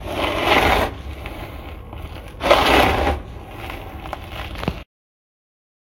Recording of myself cleaning my cat´s sandbox.